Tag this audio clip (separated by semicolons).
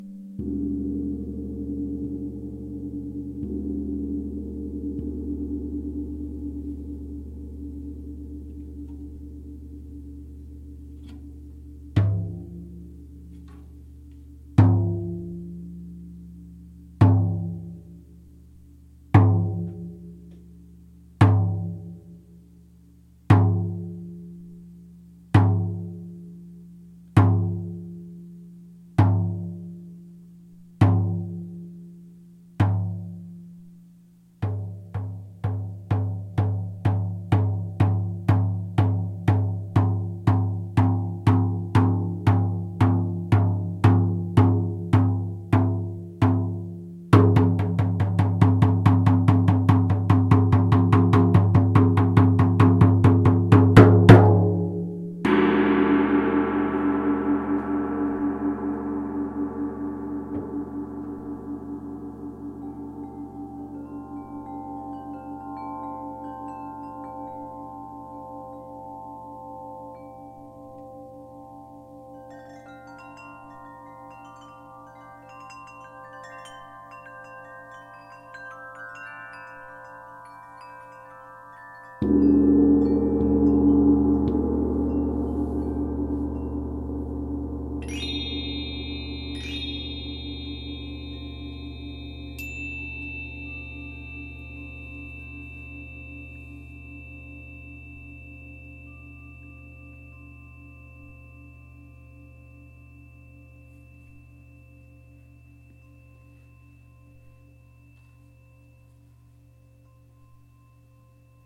gong; percussion; ambient; field-recording; wind